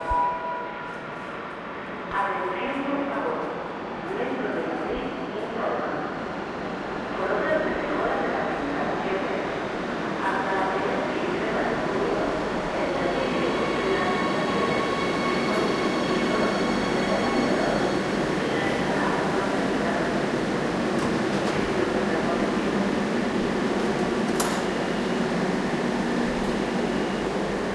megafonia message while a train is arriving at the subway station.